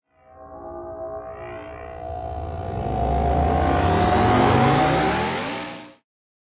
Sci-Fi Weapon Charging 02
The sound of a sci-fi cannon preparing for a blast.
spaceship, energy, laser